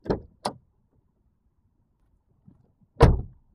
Car door opens and then closes
door, Car, slam, open, close